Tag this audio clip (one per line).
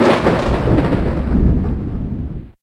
Yamaha-RM1x
sample
fx
effect